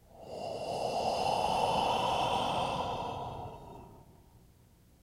breathe out (7)
A single breath out
Recorded with AKG condenser microphone M-Audio Delta AP
air, breath, breathing, human